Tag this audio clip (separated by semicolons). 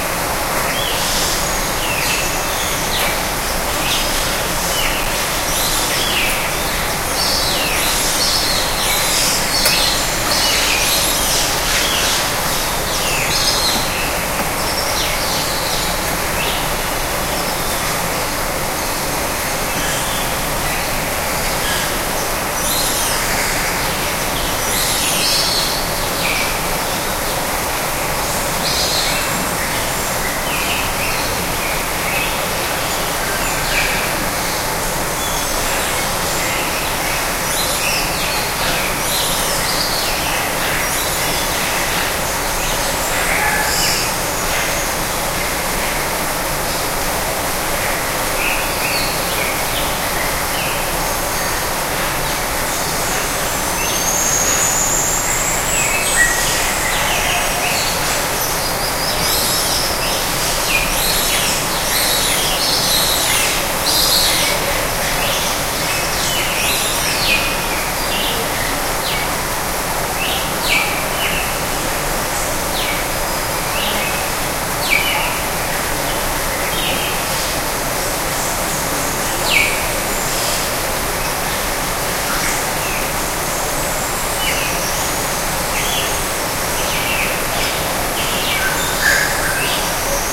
aviary; rainforest; field-recording; exotic; birds; tamarin; waterfall; tropical; jungle; forest; duck; zoo